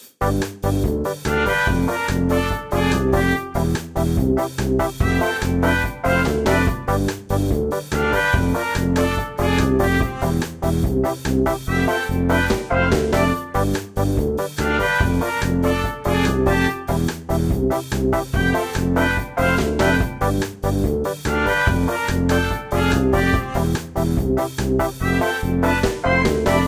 Wonky Ska
Jump to the beat! Up, up, ska, ska!
A little ska loop that came out a bit lopsided.